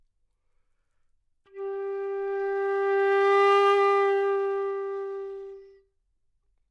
Flute - G4 - bad-stability-dynamics
Part of the Good-sounds dataset of monophonic instrumental sounds.
instrument::flute
note::G
octave::4
midi note::55
good-sounds-id::3103
Intentionally played as an example of bad-stability-dynamics
flute, multisample, good-sounds, neumann-U87, single-note, G4